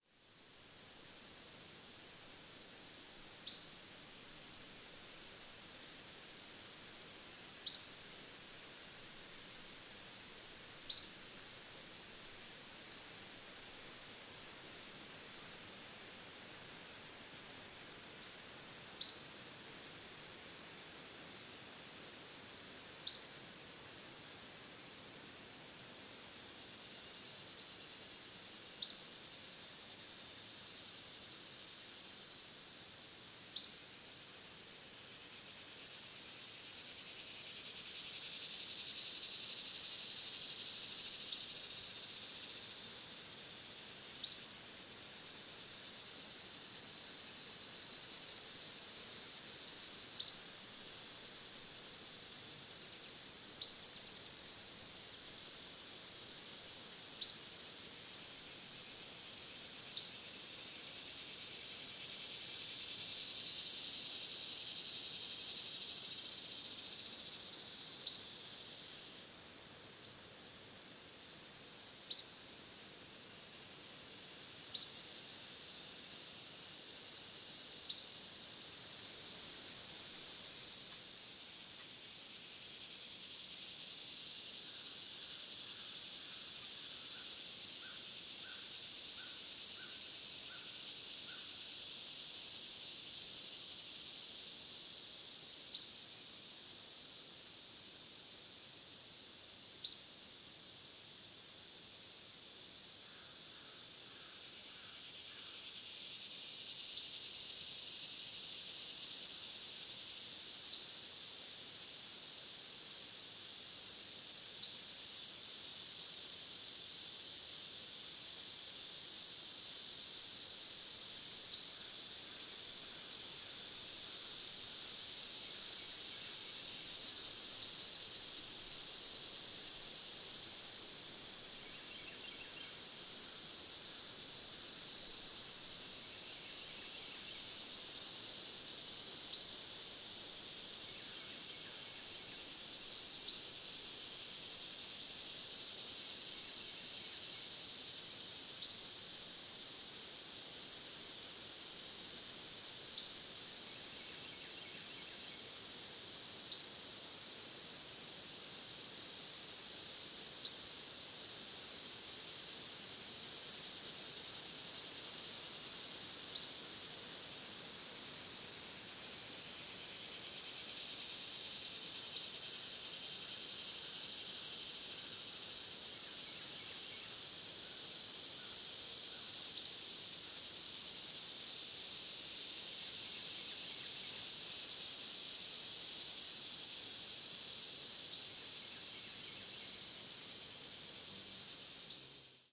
JulyWoodsWindsInsects48HzBirdChirpingJuly29th2017SD702RodeNTG2
A Peaceful, droning, relaxing Summer soundscape of the Midwestern forest. For starters, in the background you hear a river of wind steadily swirling around the Oaks, and The Maples and the Sycamore trees. A blessed northeast wind-friend. The insects take over, and on top of that, a single, solitary bird, I think a Flycatcher, softly calls his one-note. Even though this is the time of year that the visitors, those beautiful neo-tropical migrants, fill the woods, after several months of raising of raising a family, they are quiet, resting now...letting the insects signify the passage of time and the slow march to Autumn. In about 6 weeks from now, most of our visitors will have left. .back down south, their job of raising the next crop of insect-eaters done...and the woods, the forest, the nearly-dry creekbed will be packing it in.
Droning, Field-recording, Forest, Insects, July, Nature, Peace, Serenity, Summer, Woods